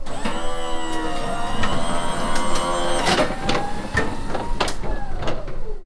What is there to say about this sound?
Designa Factory Sounds0003
field-recording factory machines
factory, field-recording, machine